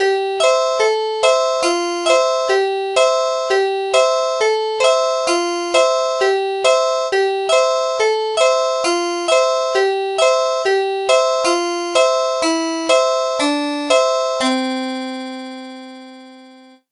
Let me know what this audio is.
Loop created with softsynth. Tempo if known is indicated by file and or tags.